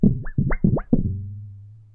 cd flipper 01
A flimsy CD/DVD shaped disk being bent in a rhythmic manner.Recorded with Zoom H4 on-board mics.
bend, blip, bong, cd, disk, dvd, sound-effect, wobble